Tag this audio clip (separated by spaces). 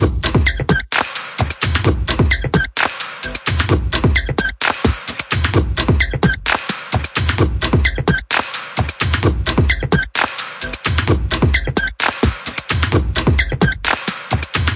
instrumental bass